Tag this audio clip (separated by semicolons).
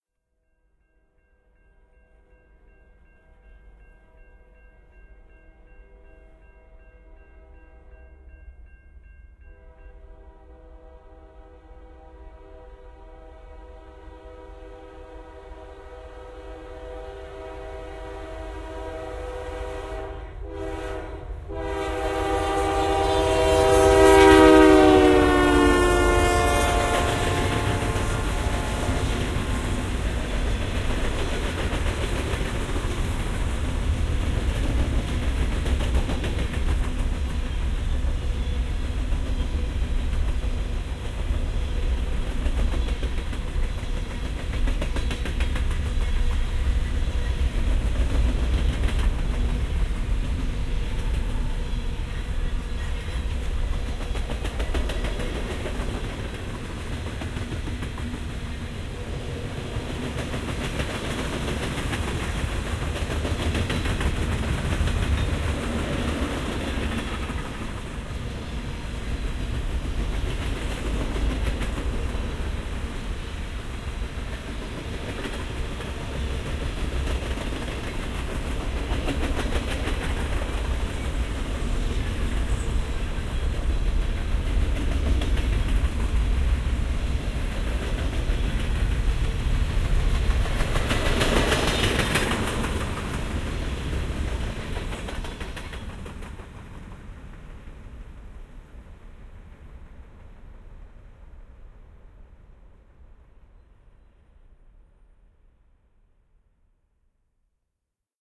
noise; ambience; ambient; field-recording